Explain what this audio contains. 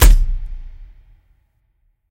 Airgun Mix 1 3
Big airgun, combined with added subbas and hall (sound needed bigger for the show). Recorded and mixed in Pro Tools. Different hit.
recotding, tools, field, airgun